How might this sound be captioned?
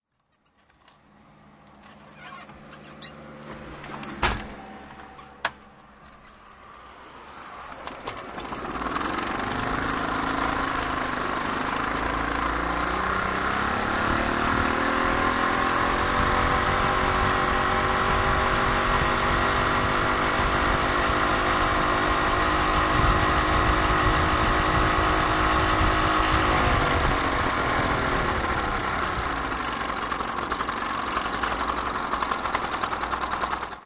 Solex30sek
Short ride with a 1969 Velosolex 3800.
Kurzer Ausritt mit einer 1969er Velosolex 3800.